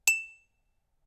Ting sound from a coffee cup
A short sample of a metal spoon hitting a coffee cup resulting in a TING! (or DING!) sound. Recorded with a Sony PCM-M10
pottery, stereo, earthen, recording, cup, coffee-cup